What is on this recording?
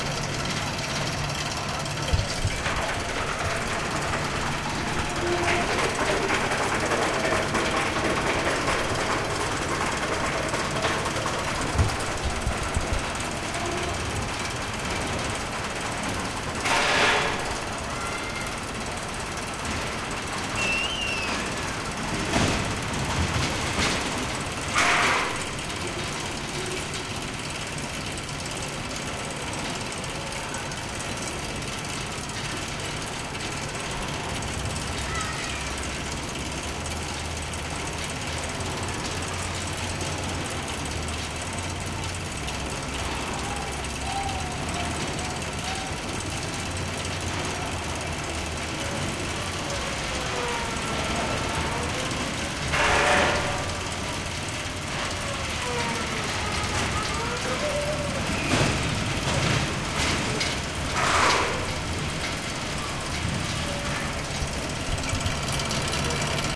Recordings from "Prater" in vienna.
leisure-park, field-recording, vienna, prater, people